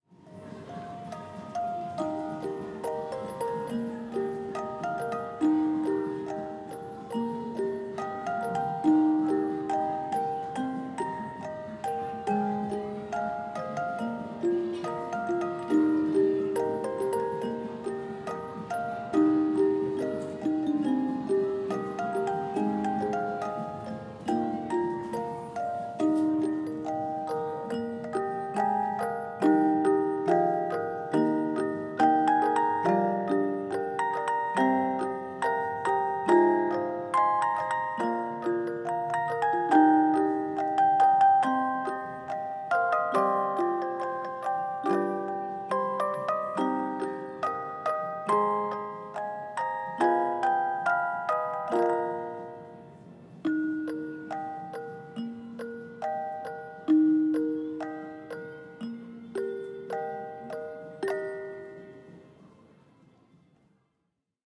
Tokyo - Music Box
Reasonably close up recording of a music box in a shopping mall. Way less room noise in the second half. Recorded in May 2008 using a Zoom H4. Unprocessed apart from a low frequency cut.
box, field-recording, h4, japan, music, tokyo, zoom